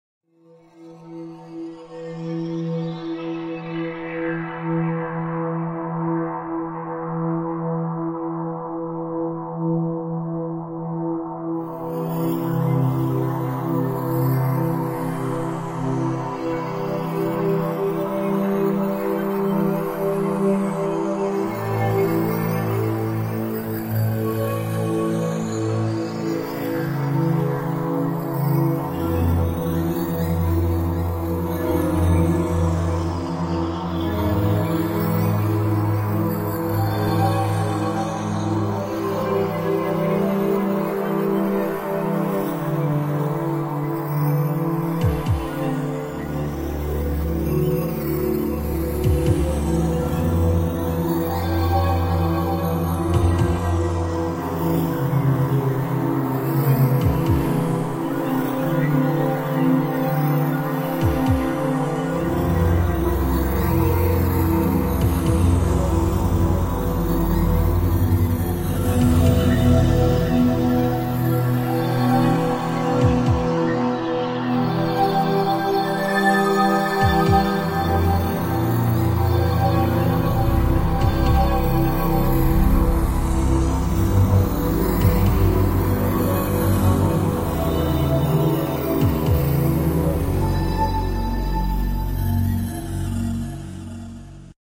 I made this in garage band for fun using the piano roll feature. I really liked how it came out and figured that someone might want to use it in a horror game / Movie / whatever.
I did my best to make it loopable but it might not sound quite right.